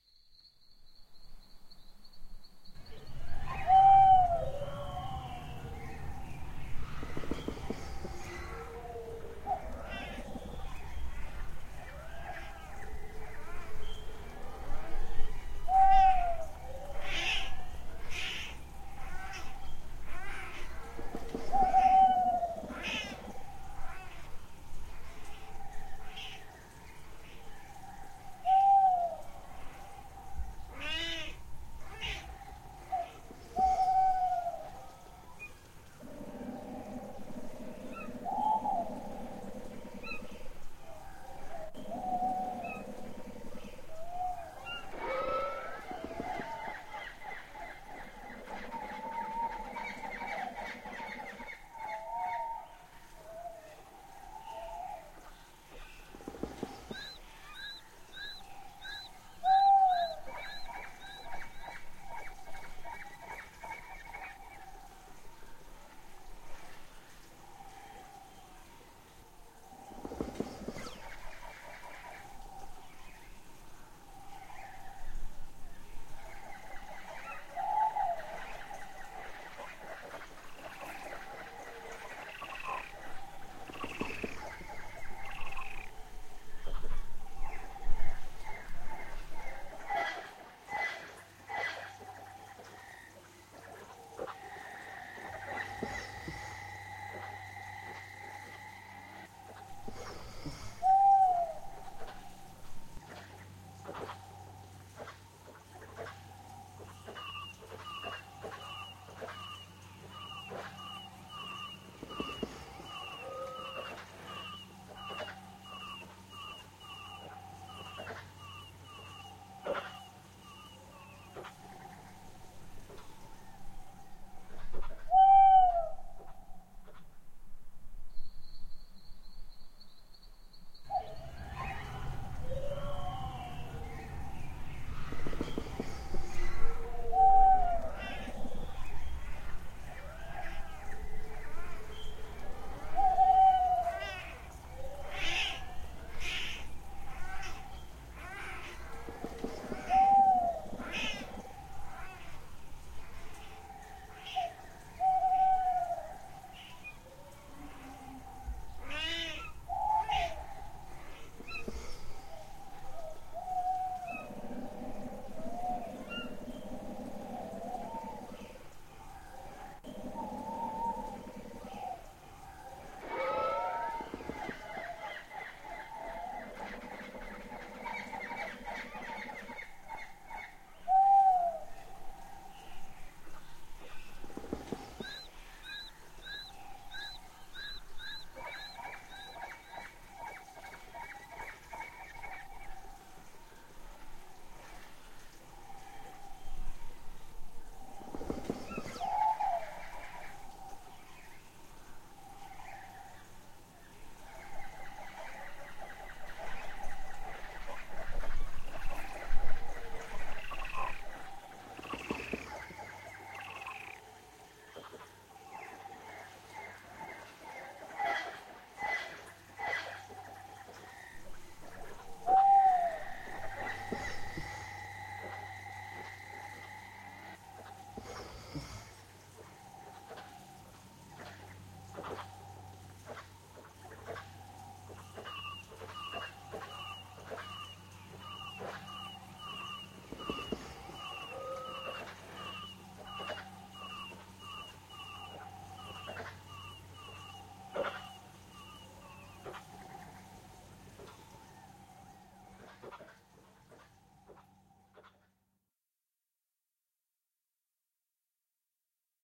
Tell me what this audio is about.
A night time woodland with animals and owls used as a spooky wood scene.